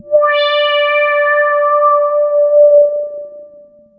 Multisamples created with subsynth. Eerie horror film sound in middle and higher registers.
subtractive, synthesis